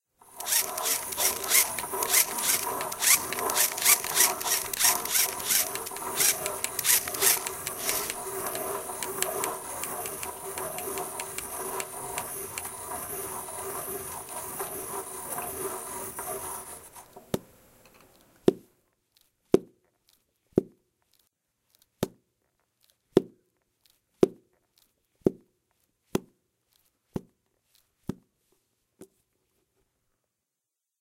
soundscape-IDES-promenade en foret par hayet et aleena
An almost 'natural' sounding composition
made by the pupils of SP3, IDES, Paris, using some of the sounds uploaded by our partner school in Ghent, Belgium.
Ghent, IDES, Paris, sounds